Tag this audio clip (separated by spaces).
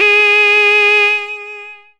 multisample reaktor